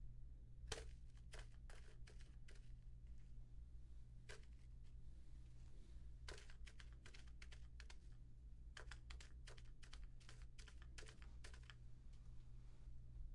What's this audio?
steps fox ground